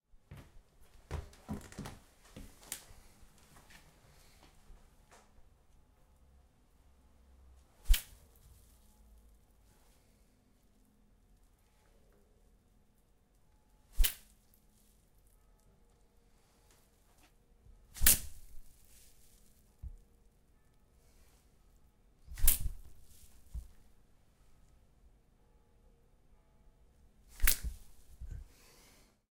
Slpash Water on ground
Light water slpash on hard ground. Can be use like a gusty blood slpash.
blood, foley, water